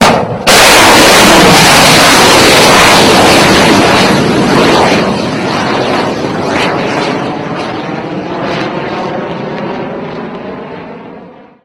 Tomahawk Missile Rocket Launch 2
BGM-109 Tomahawk Land Attack Missile Launch.